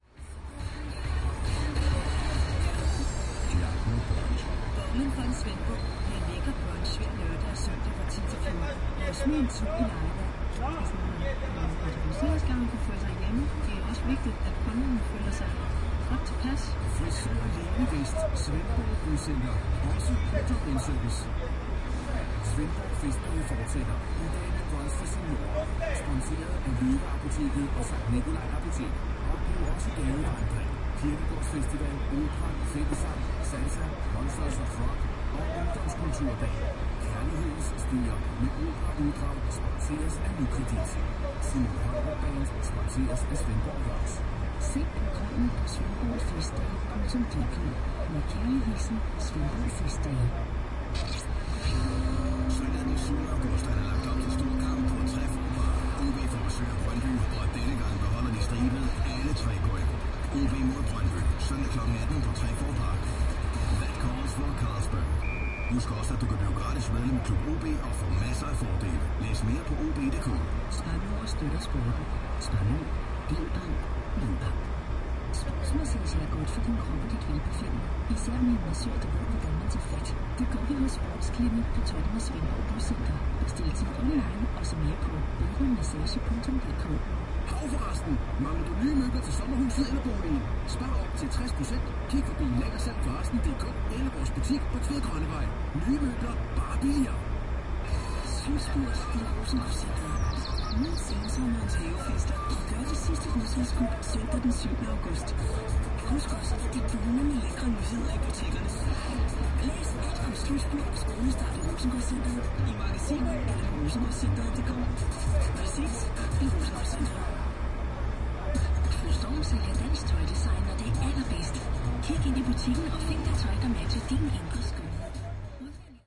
110806-the end of pause
06.08.2011: seventh day of ethnographic research about truck drivers culture. second day of three-day pause. Oure in Denmark, fruit-processing plant. truck cab ambience: danish radio, noise of recharging, some conversation in the background.